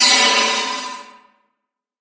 Magic Burst4
Made in Audacity using wind coming out of a metal tube, adding delay, and reverb.
magic, spell, fantasy, sparkle